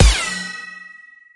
fx ricochet
cool sound made using ableton's corpus device and a kick.
metallic, free, fx, sound, cool, ricochet, corpus, pew